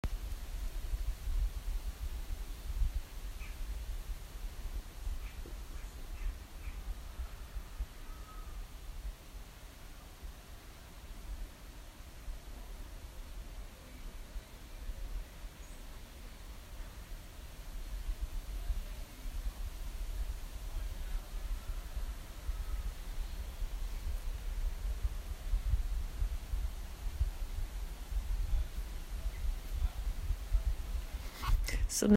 Wind in the Trees with Birds
Strong wind blowing through trees with the odd bird call